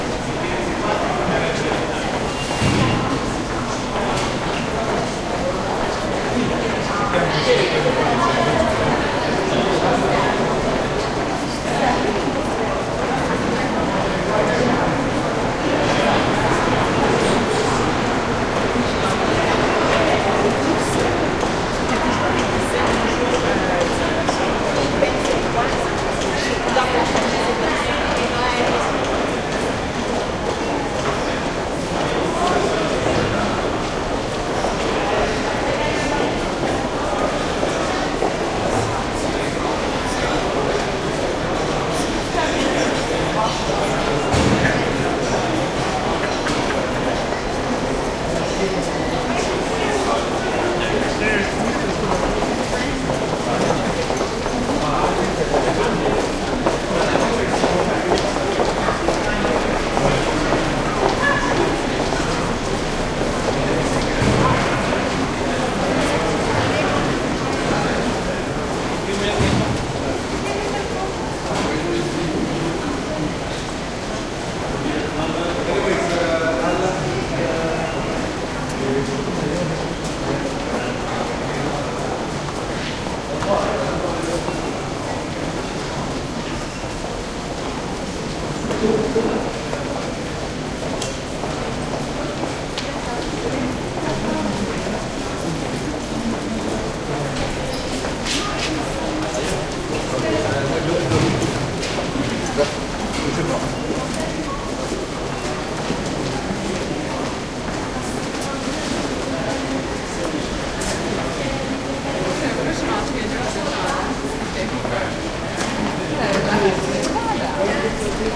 metro subway medium crowd busy footsteps echo Montreal, Canada
Montreal, metro, medium, Canada, crowd, subway, echo, busy, footsteps